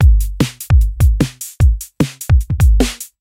Beats recorded from an MFB-503 analog drummachine